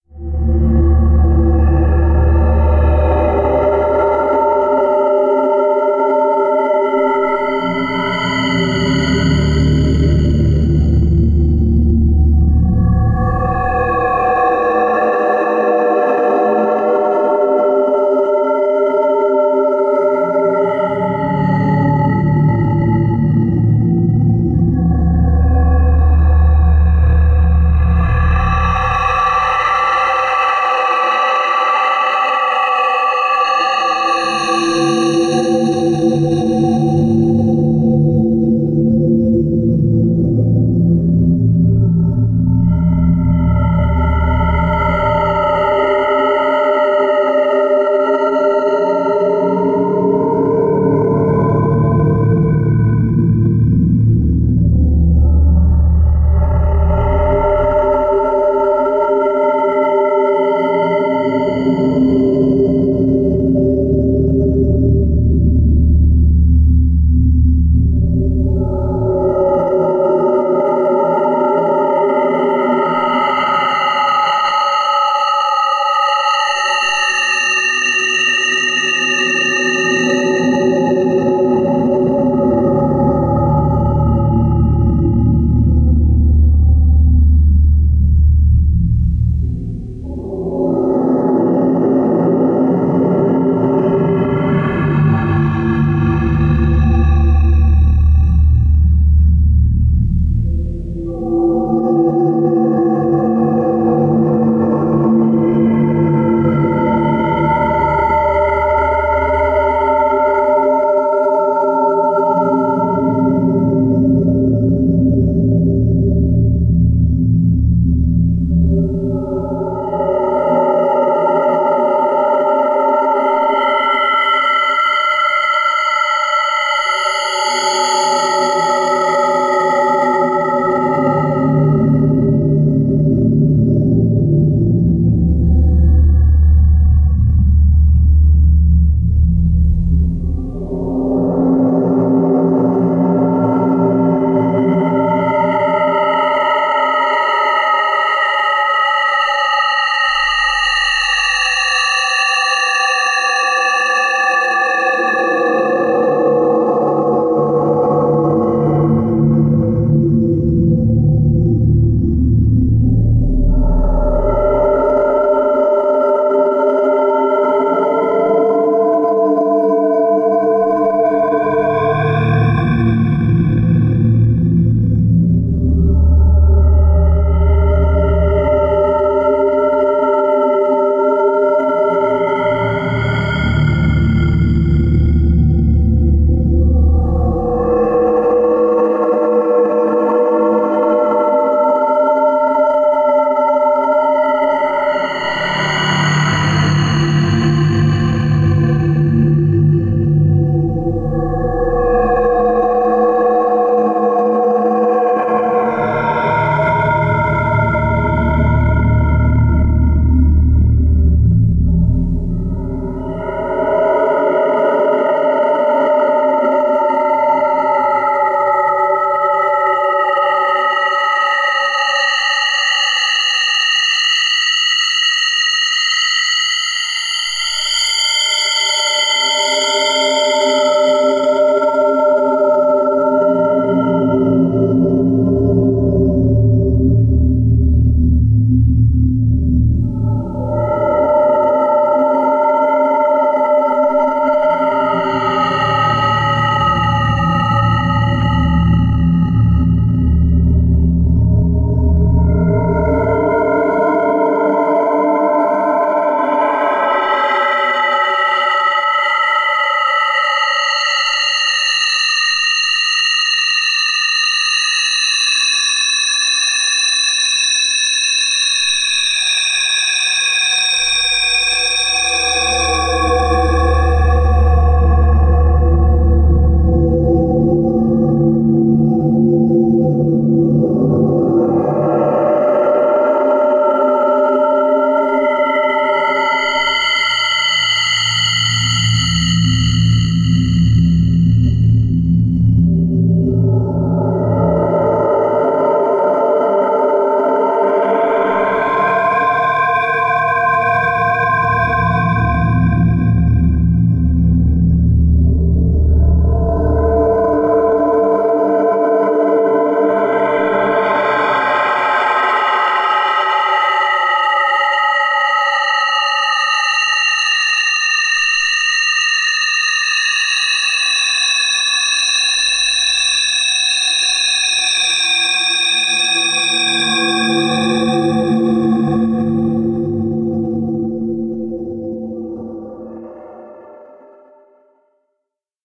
P4+P5 in 37.5 Hz (ambience)
feedback loop going through an improperly connected electrostatic mic, delays, pitch shifters (to justly tuned perfect fourths and perfect fifths), and reverb
ambience, ambient, atmospheric, evolving, feedback, loop